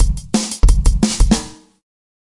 eardigi drums 36
This drum loop is part of a mini pack of acoustic dnb drums
amen, beat, break, breakbeat, chopped, drum, drum-loop, drums, groovy, jungle, loop, percs, rhythm